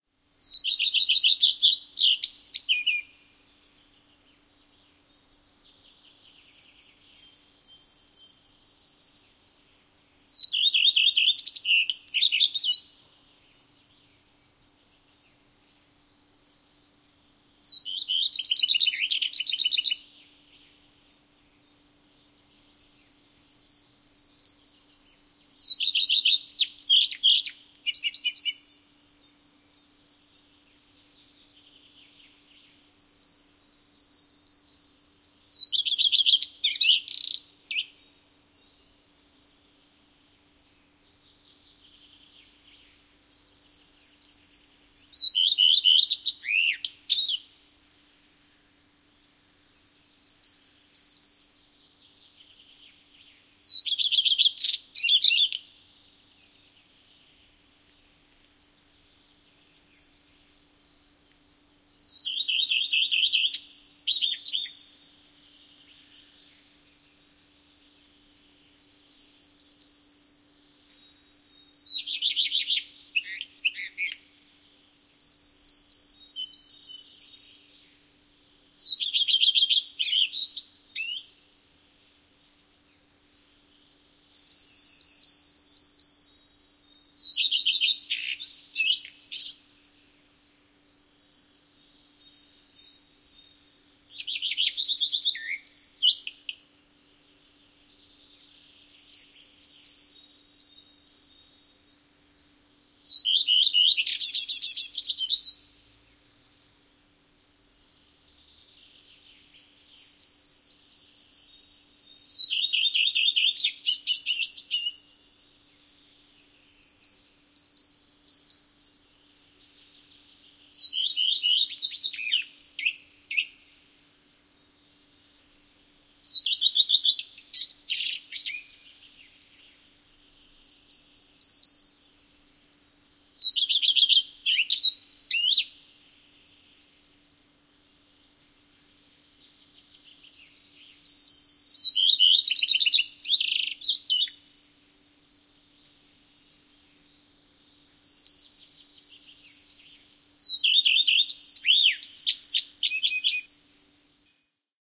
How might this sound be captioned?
Leppälinnun laulua, metsä. Taustalla kauempana muita lintuja. (Phoenicurus phoenicurus).
Paikka/Place: Suomi / Finland / Vihti
Aika/Date: 18.05.2000